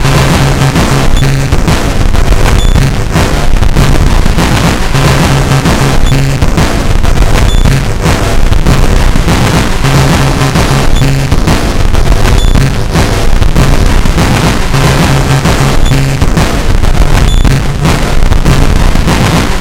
circuitbent Casio CTK-550 loop6
sample, bent, casio, ctk-550, loop, circuit